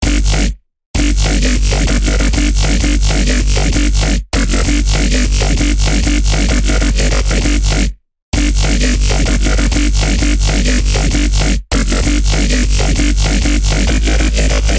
becop bass 13
Part of my becope track, small parts, unused parts, edited and unedited parts.
A bassline made in fl studio and serum.
A low grinding bassline alternating with reversed slopes at a 1/6 and 1/16 beat
bass, Xin, electronic, dubstep, loop, fl-Studio, Djzin, techno, low, grind, wobble